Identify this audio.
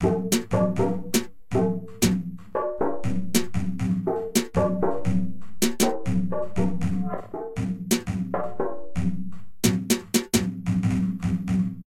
The sound of candle faces melting in the dark.
dark, mysterious